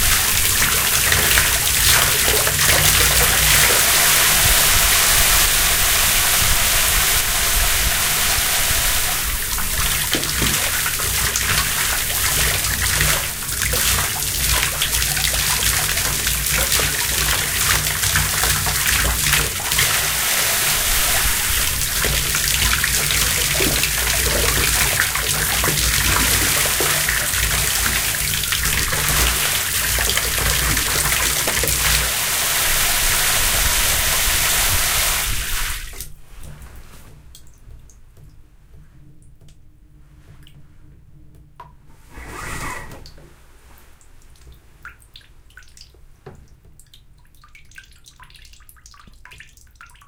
Shower Sequence
There is a little bit of wind sound made by the mass of water falling into the shower tub.
At the end of the track you can hear me putting away the shower curtain.
Recorded with Olympus LS-3 two stereo microphones, one bass (middle) microphone.
curtain, domestic, drip, dripping, droplets, drops, home, rain, raindrop, raindrops, raining, shower, water, weather, wet